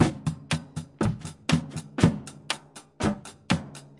Clippity Clod Drum Loop
4/4 drum loop, not quantized. Played on found objects and drum set.
drum-loop, drums, garbage, percussion-loop